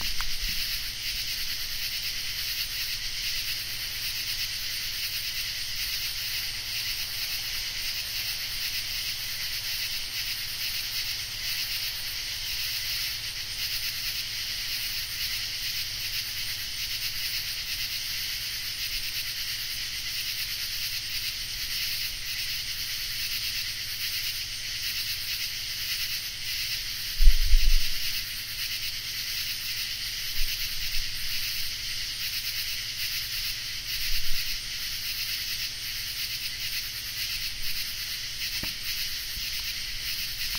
Arrow Rock Nocturne 01
Ambient recording of insects at night in Arrow Rock, Missouri, USA. Recorded July 2, 2012 using a Sony PCM-D50 recorder with built-in stereo mics. The insects are very loud through the night. The taller the trees, the thicker they seem to cluster. This was taken near a young, low tree in a residential yard.
Arrow-Rock, microphone, nocturnal, PCM-D50, field-recording, built-in-mic